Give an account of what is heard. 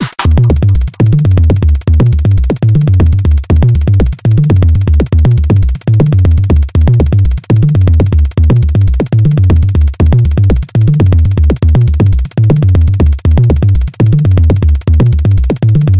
Loop created in NI Reaktor
loop, reaktor, glitch